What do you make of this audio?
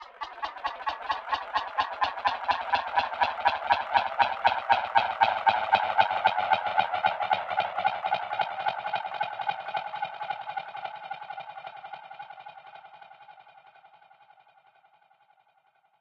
swans fly-by G20bg
Simulation of the fly-by of a group of swans. I am not quite contented with this sound, but I could not get it better.
animals, artifical, birds, Doppler-effect, flap-of-wings, fly-by, flying-by, simulation, swans, synthetic, wings